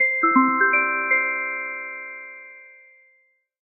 Puzzle Game Victory Melody
win, beep, bloop, end, click, synth, game, game-menu, uix, startup, application, menu, puzzle, lose, mute, bleep, correct, clicks, sfx, event, ui, gui, timer, blip, achievement, button, buttons